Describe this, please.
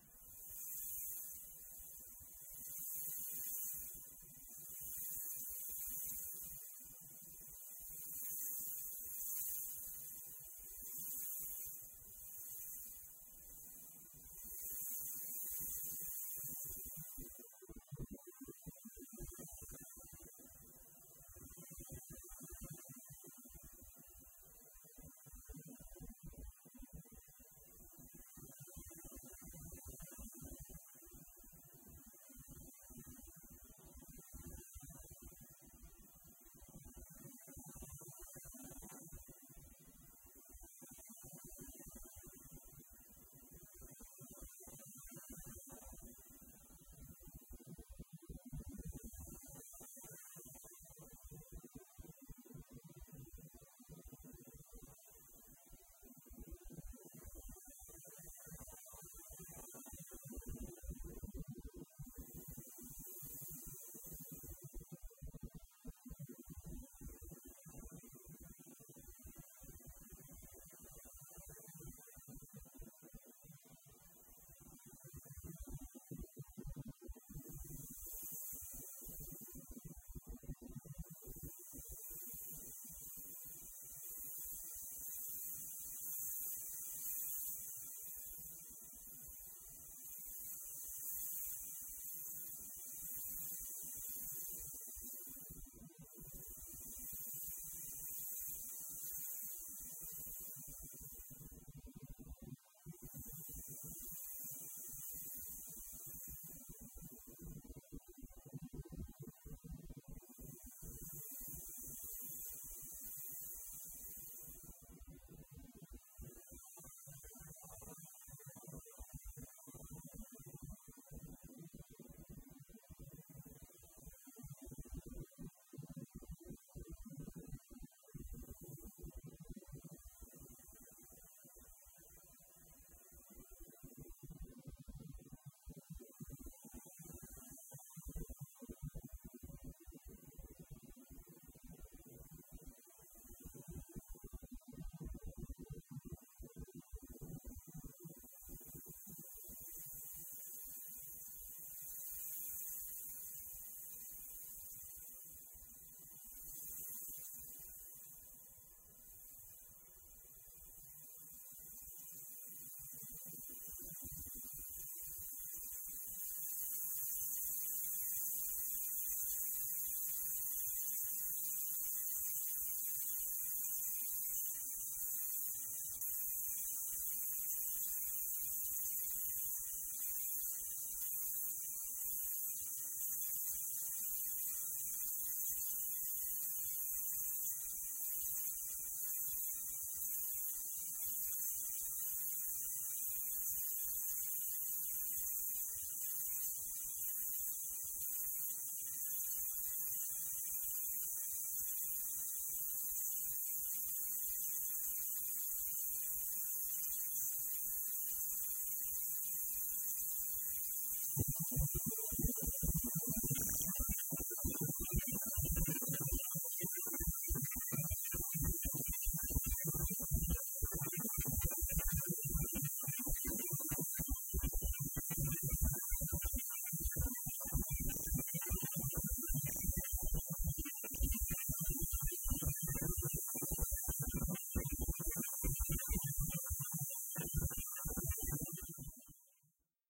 Microondas interior
Kitchen, Telephone-Pickup-coil, Machine, Microondas, Microwave